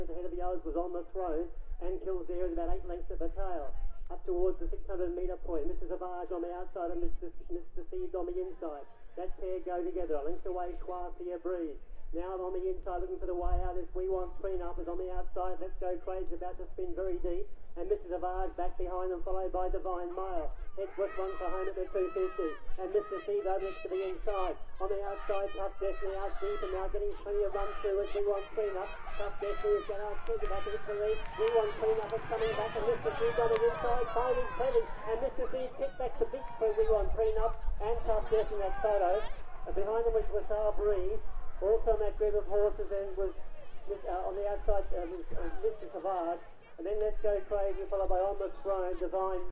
A recording of a horse race track side at a country race meet in Beaudesert.
Crowd, Horses, Loudspeaker, Shouting, Talking